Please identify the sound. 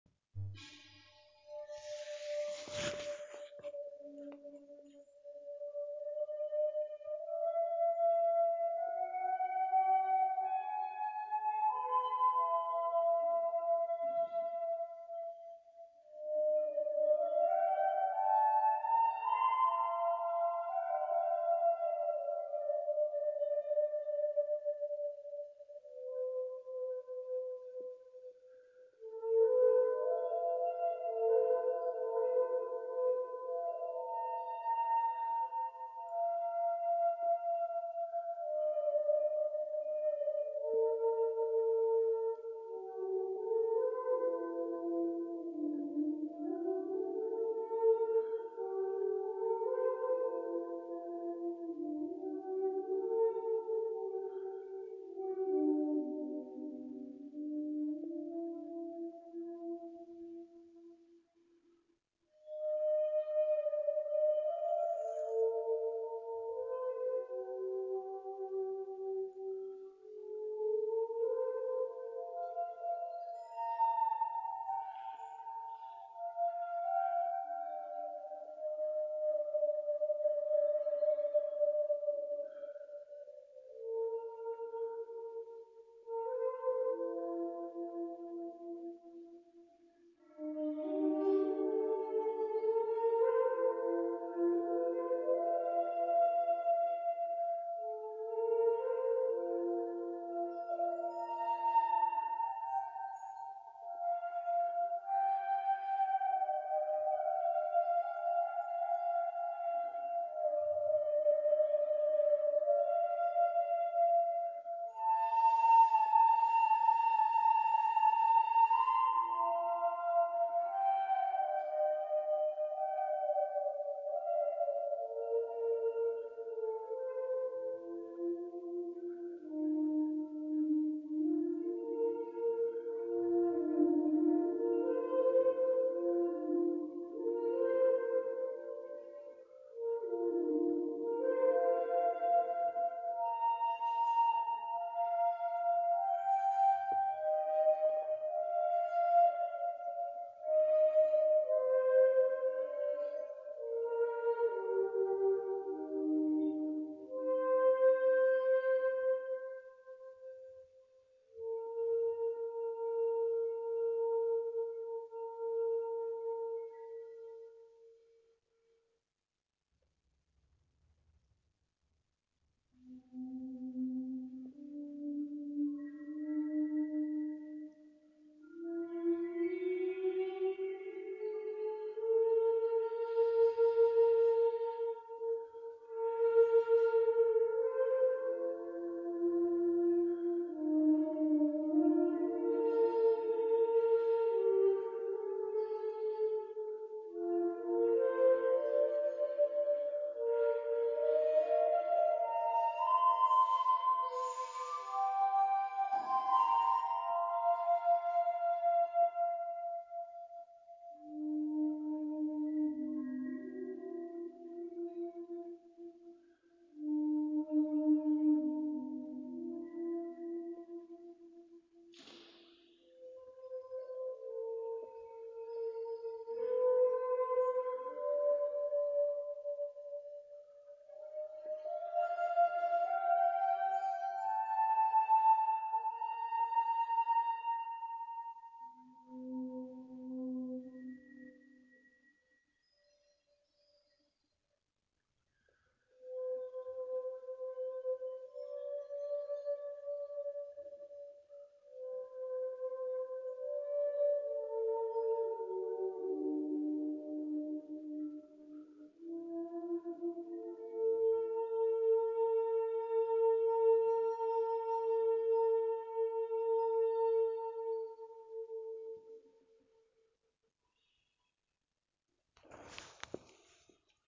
Shakuhachi flute play
play, Shakuhachi, flute